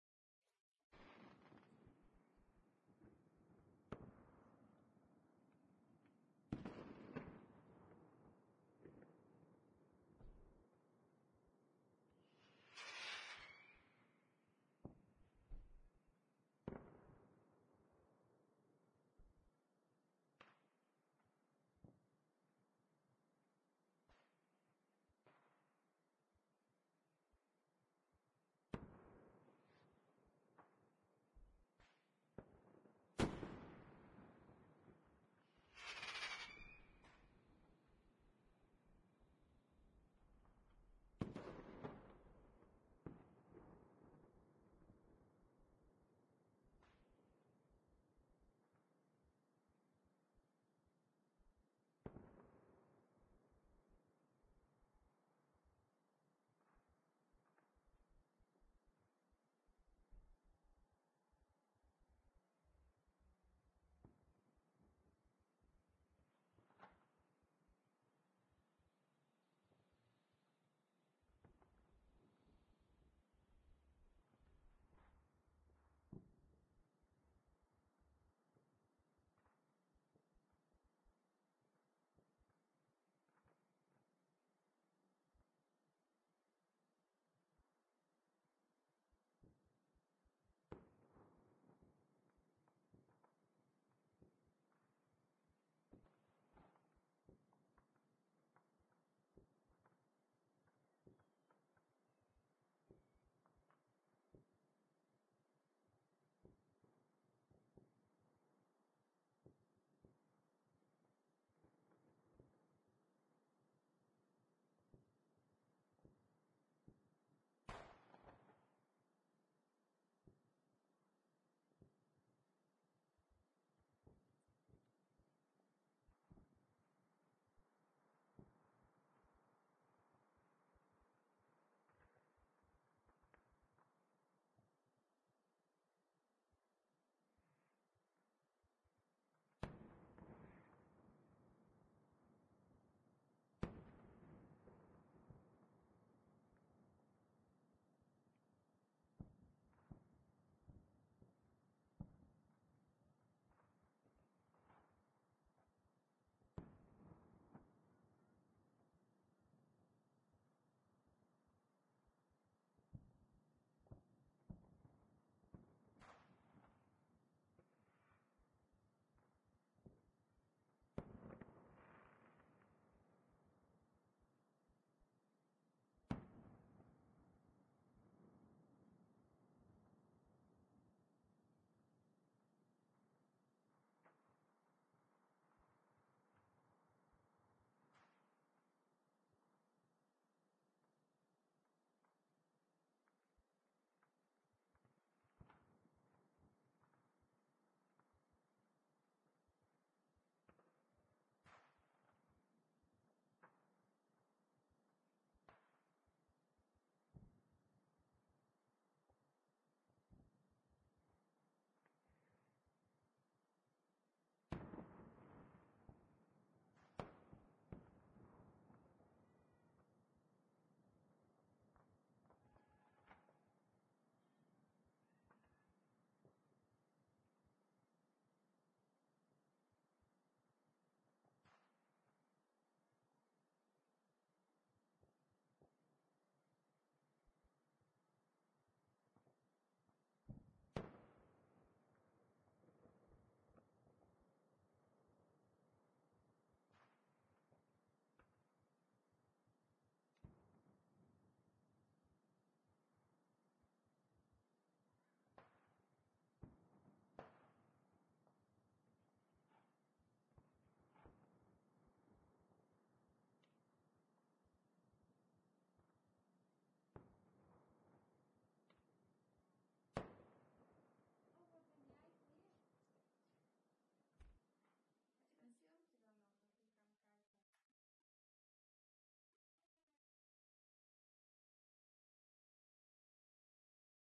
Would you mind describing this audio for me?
New year's eve in the mountains. Stereo recording. I was in a house overlooking the valley, leaning out the window. Fireworks left right and ahead, echoes off the mountains.